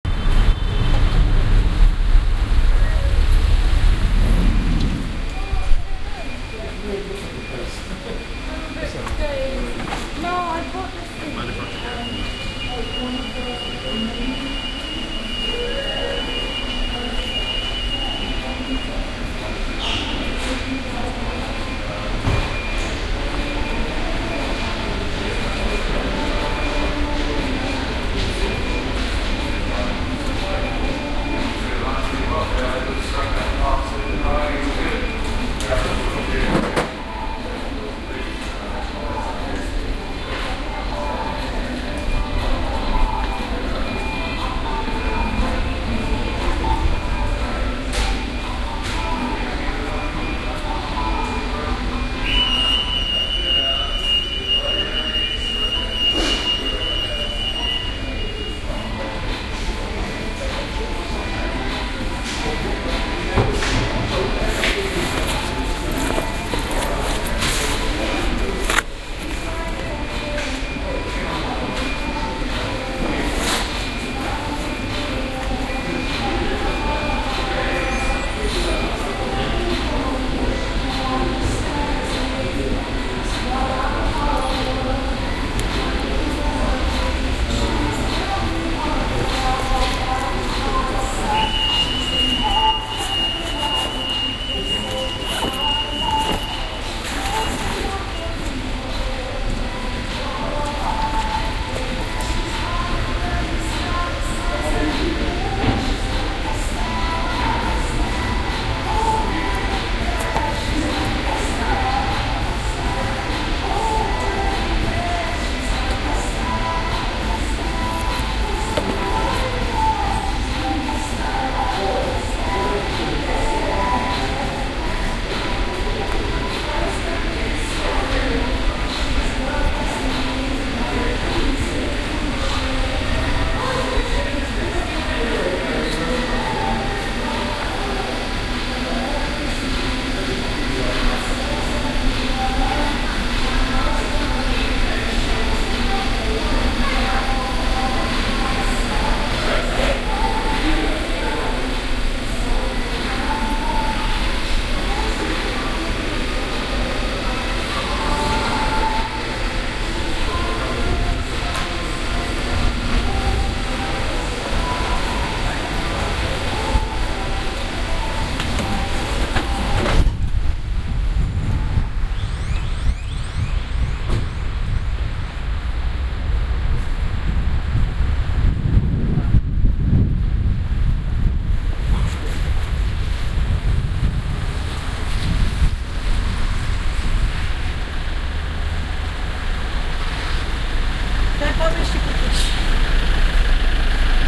Tottenham Hale - PC World
ambiance, ambience, ambient, atmosphere, background-sound, city, field-recording, general-noise, london, soundscape